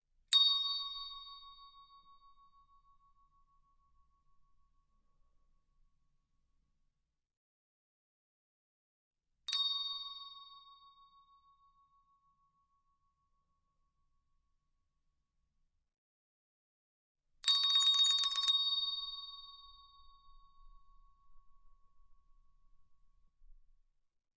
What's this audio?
chromatic handbells 12 tones c#1
Chromatic handbells 12 tones. C# tone.
Normalized to -3dB.
single, chromatic, stereo, tuned, ring, percussion, handbell, English-handbells, double, bell